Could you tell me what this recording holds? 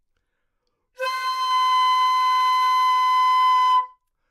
Flute - B5 - bad-attack
Part of the Good-sounds dataset of monophonic instrumental sounds.
instrument::flute
note::B
octave::5
midi note::71
good-sounds-id::3082
Intentionally played as an example of bad-attack
B5, flute, good-sounds, multisample, neumann-U87, single-note